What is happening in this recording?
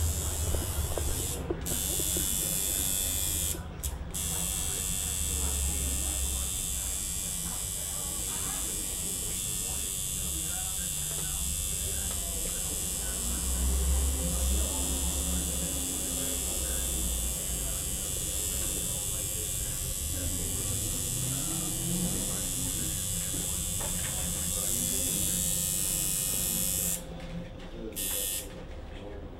This was taped at The Illustrated Man tattoo shop in Sydney on the 17th of April 2007. The tattooist is a friend 'Snake' and the girl (Anjel) was having 'Breathe' tattooed down her side.